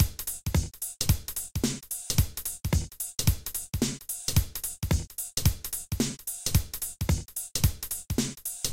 110 d# min - Rock drums
Made with some awesome Beatbox Samples. I use it in a Project for Oldskuul Beats. It was made in Bitwig Studio with the buildin Drummaschine.
Drums, Hiphop